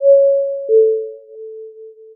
Airport Bingbong
A mono recording of the tones used before an announcement at an airport.
announcement, bells, ding-dong, tones